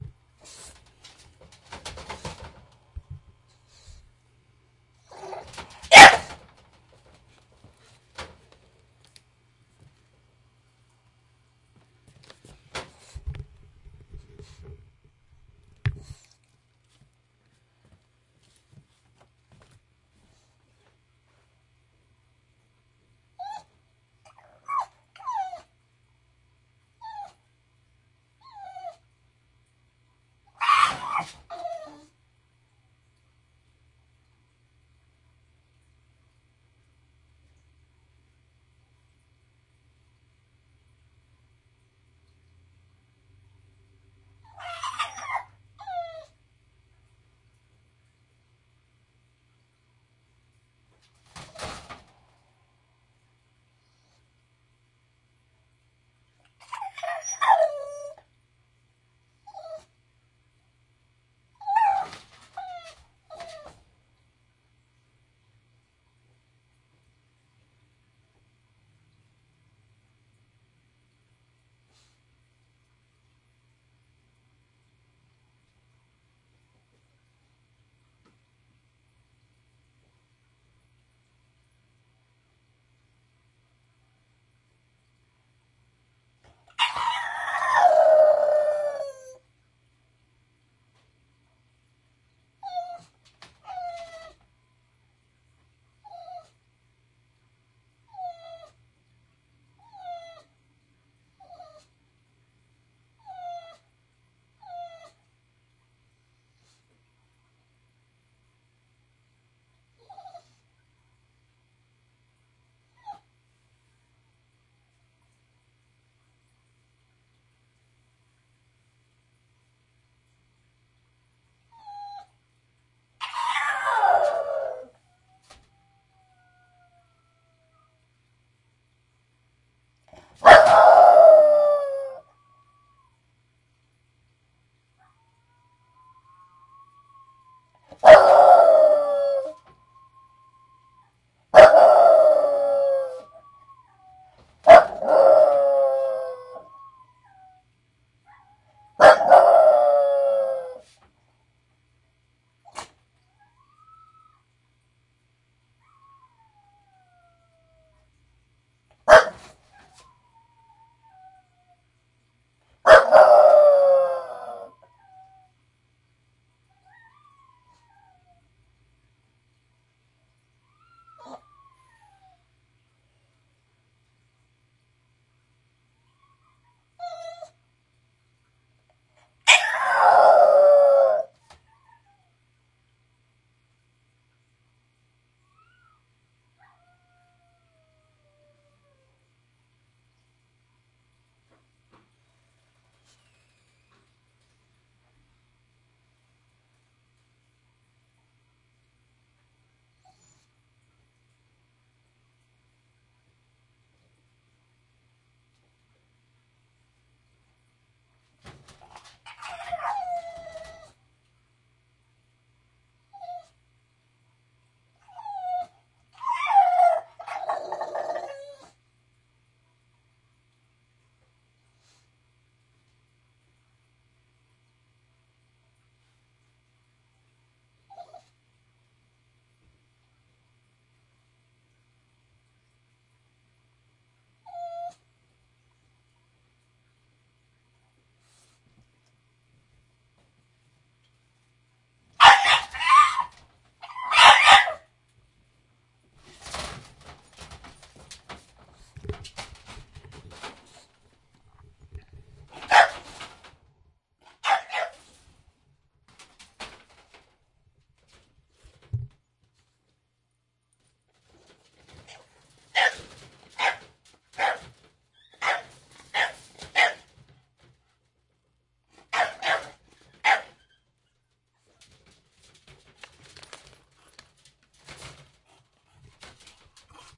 Bulldog Howl
Our English Bulldog crying, barking, and howling. She was throwing a fit because she wanted to go for a walk. You can slightly hear the toy poodle also howling in the background. Some extended periods of silence will need to be downloaded and edited out if you like this piece.
dog, cry, howl